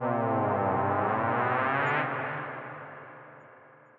HOT Siren 1 short
Synth Alarm FX
Alarm, Synth